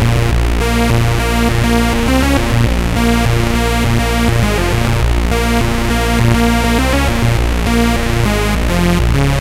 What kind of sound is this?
Stezzer bass lead 102
This is a bassline I made using Alchemy vsti DanceTrance/ Leads/ Mentasm instrument, run through ohm boys LFO delay at pitch A#3. Enjoy :)
sequence, industrial, stezzer, tracker, trance, acid, bassline, dance, hop, bass, line, trip